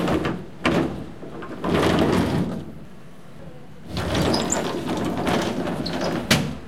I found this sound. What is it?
glass-door-slide04
Sound of a glass sliding door being opened and closed. Recorded with a Zoom H4n portable recorder.
close, closing, door, glass, glass-door, open, opening, slide, sliding-door